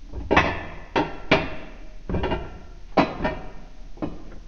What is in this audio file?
I think this sounds a lot like the slate in Prince of Persia before it falls. Enjoy!
chink, clank, large, metal, reverb, spoon, switch